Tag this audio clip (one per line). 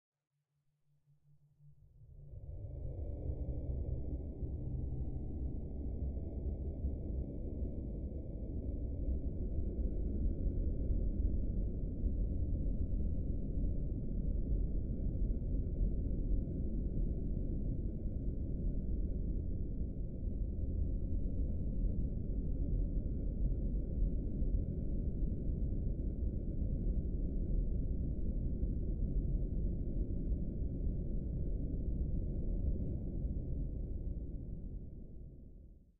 air
breath
low
noise
shock
shocked
sub
suspense
tension
wind